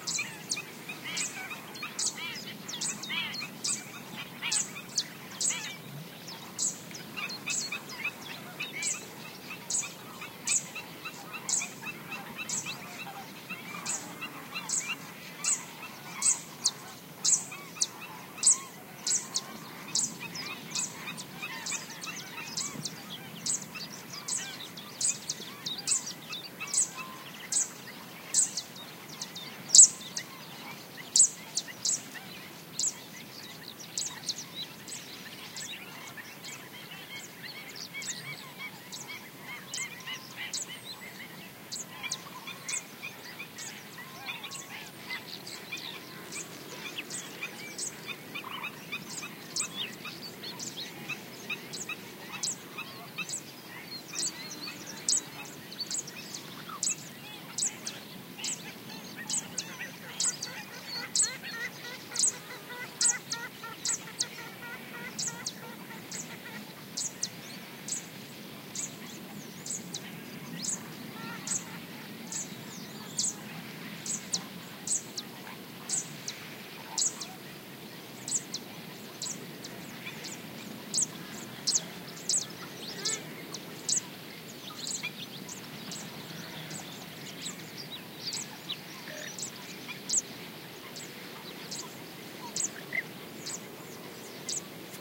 black winged stilts calls and chirps from other birds